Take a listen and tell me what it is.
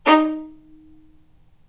violin spiccato D#3
spiccato, violin